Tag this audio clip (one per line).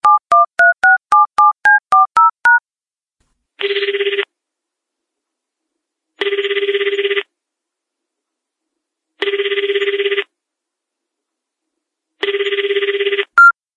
phone
telephone
calling
call
Japan
mobile